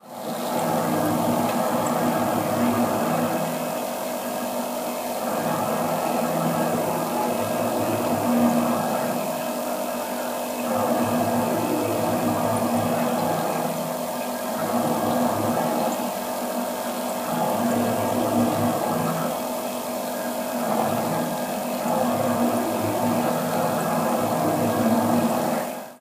My bar fridge in the middle of the night sounds like a spaceship.